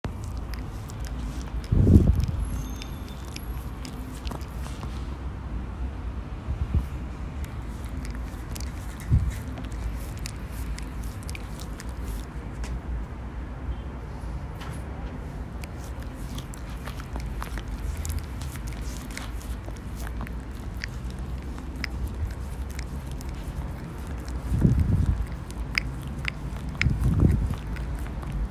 dog licking a person
lick, dog, pet, animal